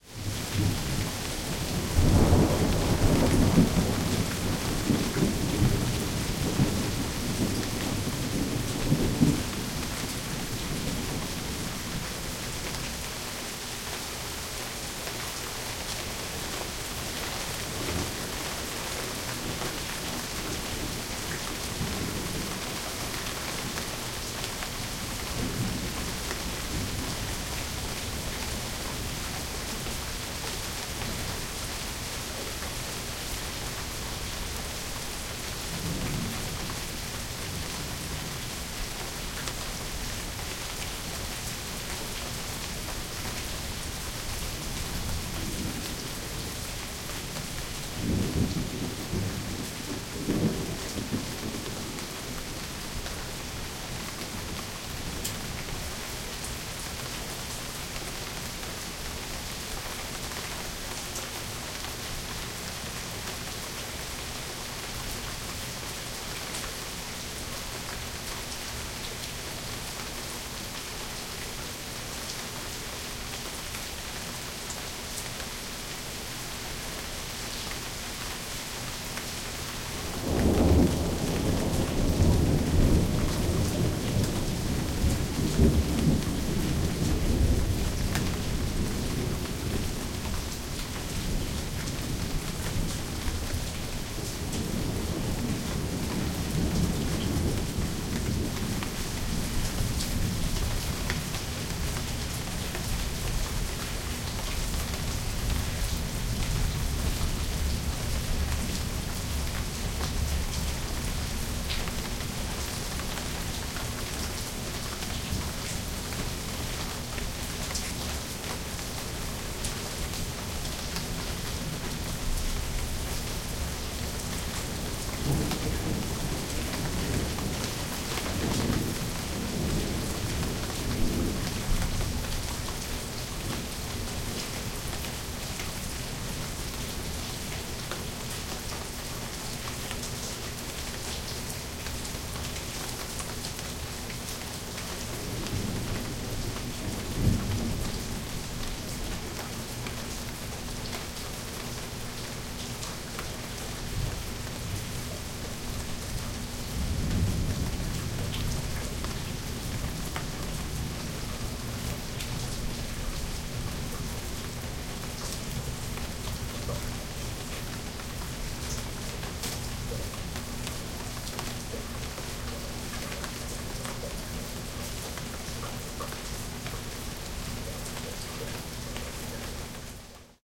Summer storm montage. Rain and thunder. Midwest, USA. Zoom H4n, Rycote Windjammer